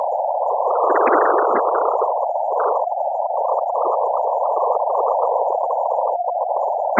Same idea as for my Iteration Project 1 sample pack, but this time the starting point is the picture from someone else's sound and then iterating the sound-to-image-to-sound process.
This sample is the conversion to sound from the image of this sample:
Used Nicolas Fournell's free Audiopaint program to convert from pictures to sound. The starting sound was approx 7s in lenght, so I will use that. Min freq 50Hz, max freq 10,000Hz.
Left channel volume was a bit weak so boosted it up.
iteration, synthetic